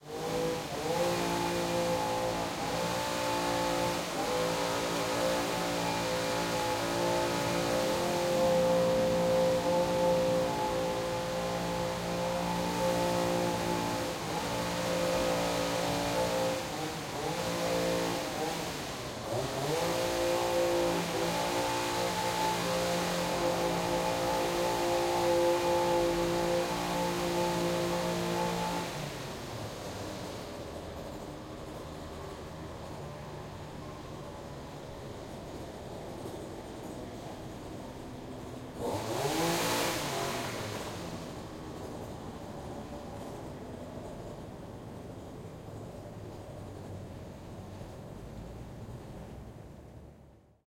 Long Chain Saw cutting big tree Power tools edlarez vsnr
Long Chain Saw cutting a big tree, longer cutting sound, clean no dialogues Power tools edlarez vsnr.
chain-saw, chainsaw, cutting, power-tools, saw, sawing, wood